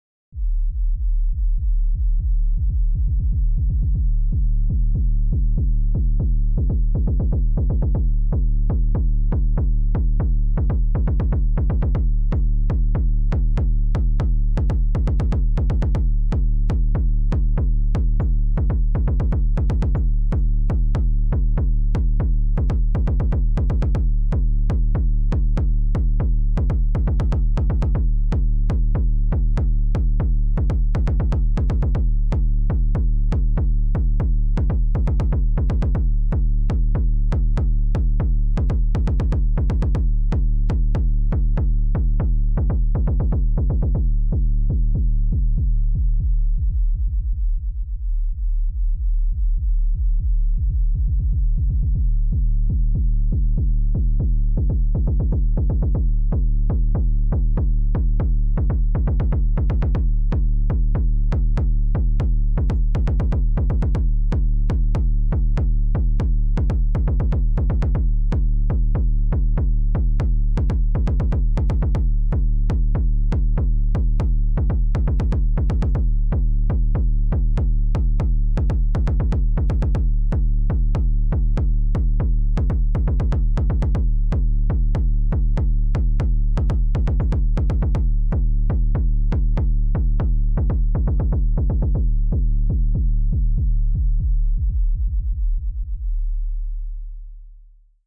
Building Tension - Kik Drum
A Minimal Kickdrum beat, filtered up and down. Could be used to build tension under video content that already contains other audio.
MOVIE, TENSION, THRILLER